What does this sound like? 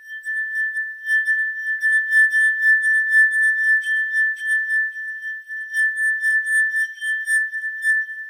A glass of water tuned, if I remember right, to A, and bowed mercilessly with wet fingers. (I plan to Hourglass this sometime.)
Recorded by Sony Xperia C5305, some editing in Audition.